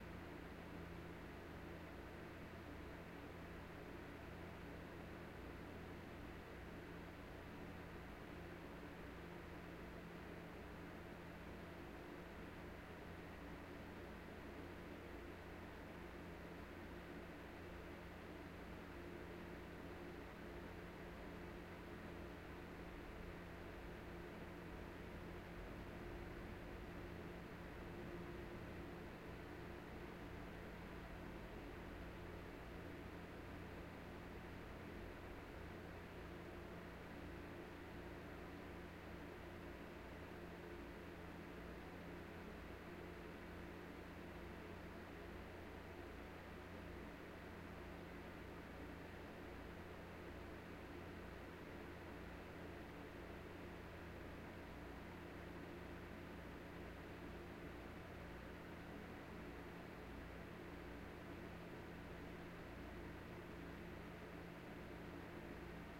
Bedroom with Fan Ambience
A fan running on the Low setting in a Bedroom.